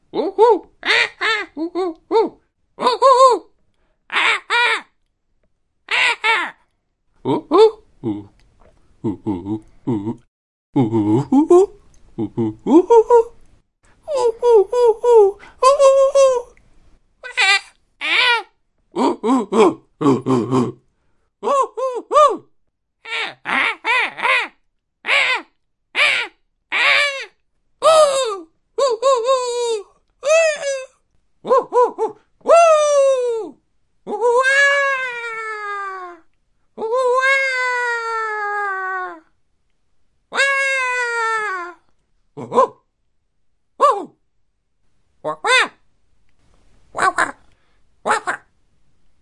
Different emotions of a cartoon/anime style monkey in a war game.